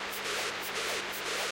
The Mute Synth 2 is mono, but I have used Audacity cut and put together different sections of a recording to obtain a stereo rhythmic loop.
seamless-loop, Mute-Synth-II, rhythm, rhythmic, noise, Mute-Synth-2, stereo